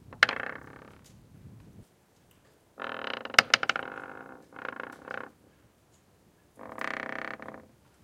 Creaks of a metal hinge on a wooden door. Recorded inside a large wooden goahti at Ylläskaltio hotel in Äkäslompolo, Finland.